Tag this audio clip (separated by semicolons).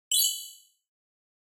effect; beep; UI; button; blip; select; option; cinematic; sfx; bleep; switch; film; gadjet; click; keystroke; interface; game; screen; GUI; menu; confirm; fx; computer; application; typing; command; signal